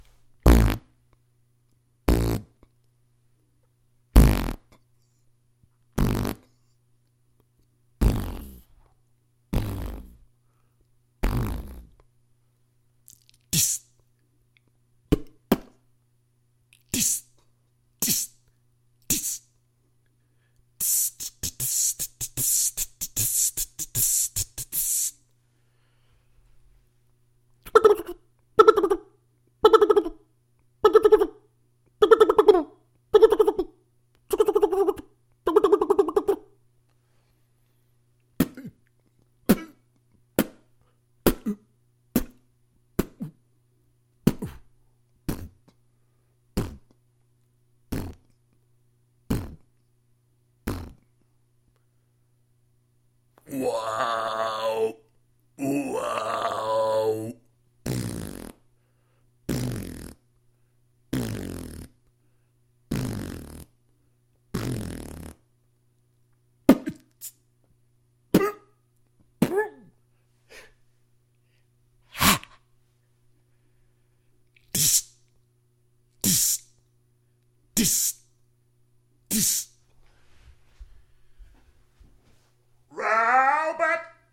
Beatbox sounds by Deadman as used in my DeadmanBeatbox soundfont and upcoming Deadman Beatbox VSTi.